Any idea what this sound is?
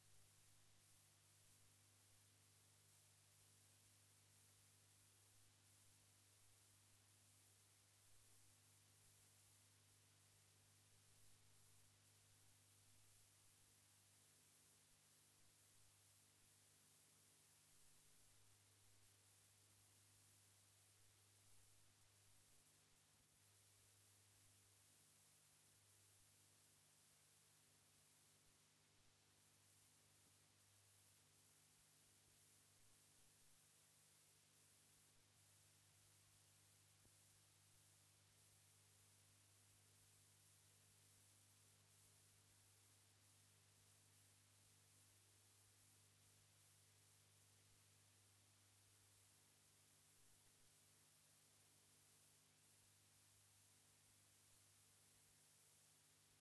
Self noise + tape hiss from my Roland RE-201 Space Echo.
Repeat mode 2.